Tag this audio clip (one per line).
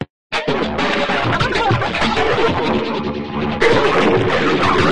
pressy
drastic
harsh
background
ey
dee-m
ambient
idm
soundscape
dark
virtual
noise
processed
glitch
d
m